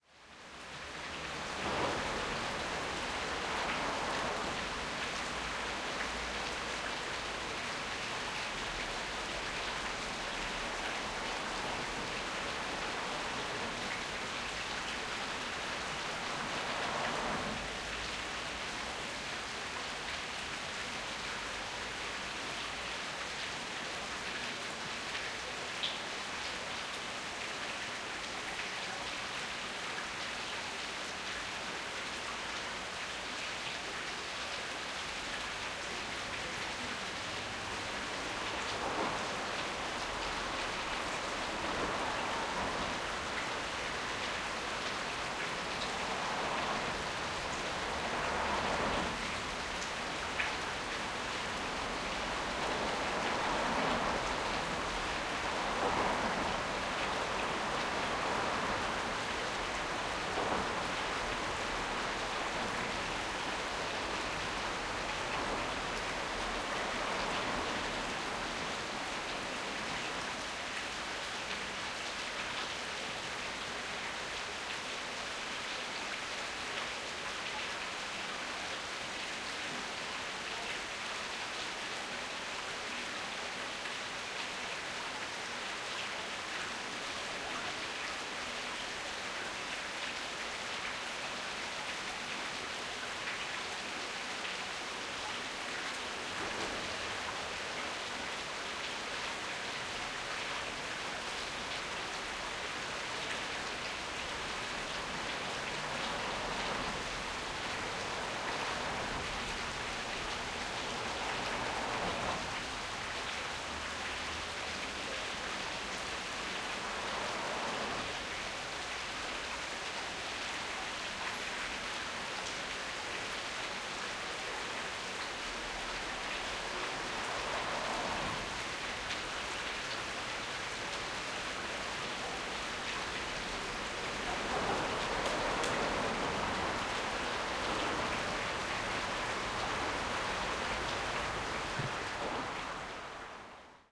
hi-fi szczepin 31082013 under romana dmowskiego bridge
31.08.2013: Sound of water leaking from pipes under Roman Dmowski bridge (Wroclaw in Poland).
marantz pdm661mkII + shure vp88